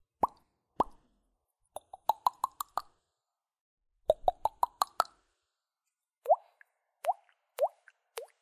pop-flash-mouth-sounds
Several different sounds of animation made with mouth
cartoon, effects, mouth, pop, sound, whoosh